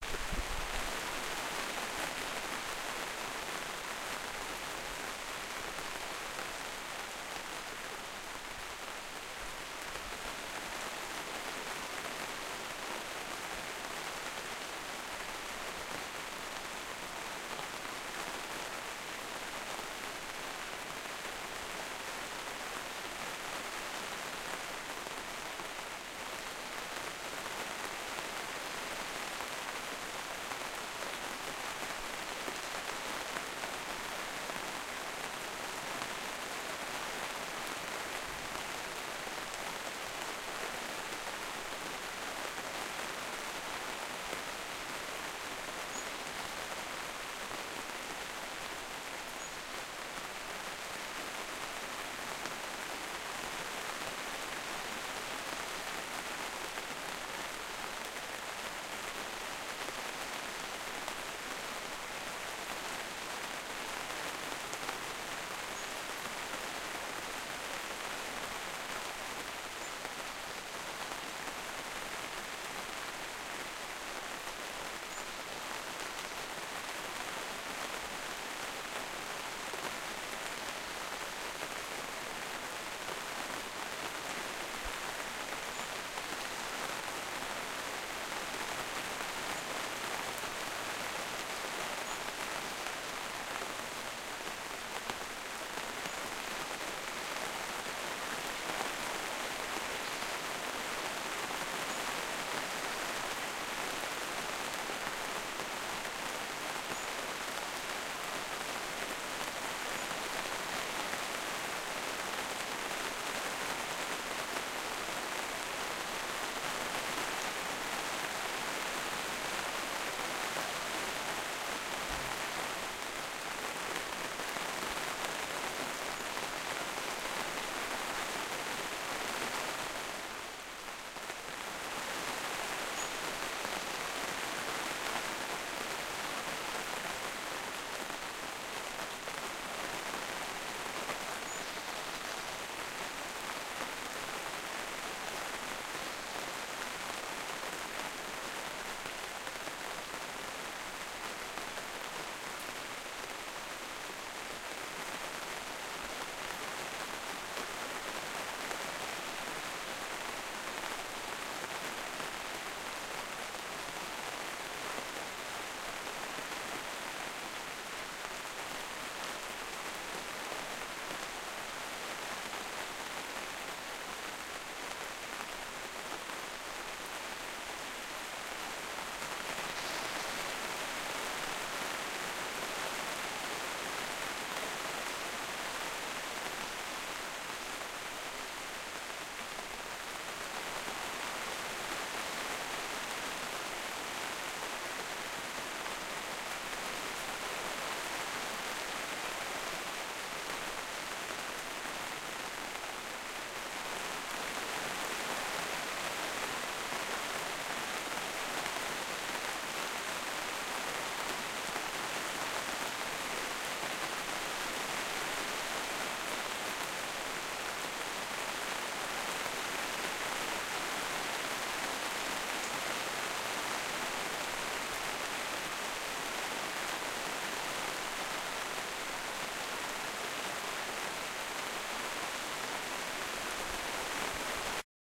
rain inside the greenhouse 1
Rain in the greenhouse